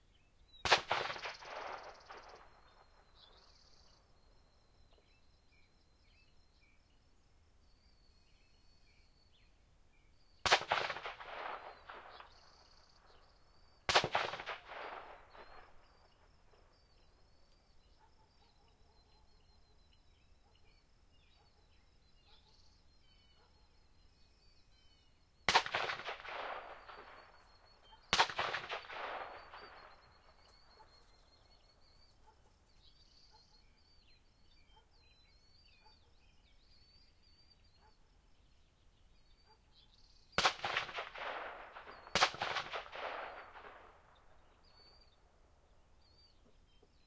7 total gunshots from a distance with long echo trail. This was my neighbor shooting at his pond so there are ambient noises of bugs and birds in the background but the shots are nice and clear if you need them.